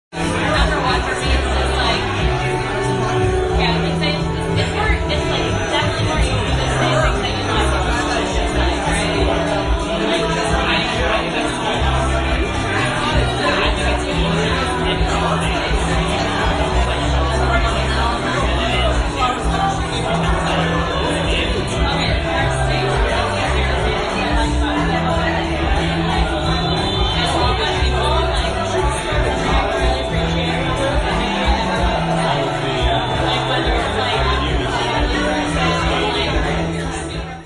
crowd - bar 2
crowd - bar - electric bicycle vancouver